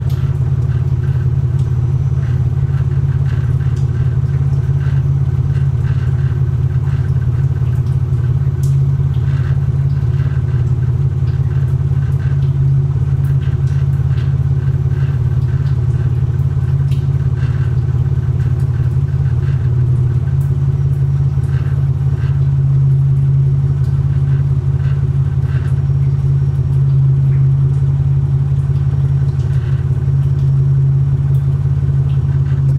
Mitsubishi Pajero idle in Moscow traffic, exhaust, rear perspective - including some water drain running under the car.